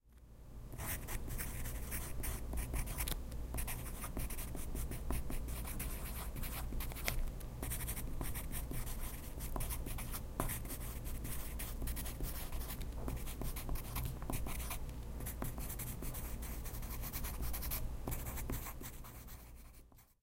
Here is the sound of someone writing something on a piece of paper.